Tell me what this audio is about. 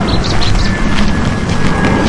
A sound of a Coot. Recorded with a Zoom H1 recorder.
Deltasona, trees, field-recording, coot, pratdellobregat, nature, fotja, water